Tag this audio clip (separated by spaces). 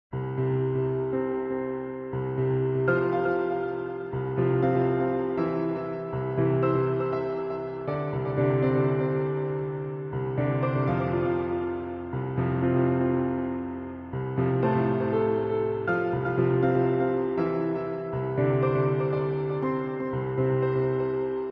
arpeggio loop synth arp piano delay